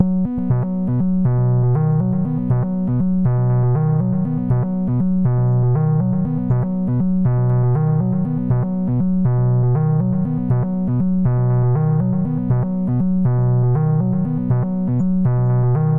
bassline fsharp b esharp 120bpm-01
bassline fsharp b esharp120bpm
acid, ambient, bass, bassline, bounce, club, dance, dub-step, electro, electronic, glitch-hop, house, loop, rave, seq, sequence, synth, synthline, techno, trance